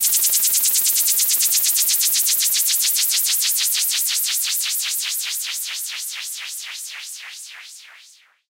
enigmatic noise sweep 2a
I was trying to make some fx you can hear in Enigma’s tracks (for example ‘The Eyes Of Truth’).
Made with Audition.
P. S. Maybe it’d be a better sound if you listen to a downloaded file.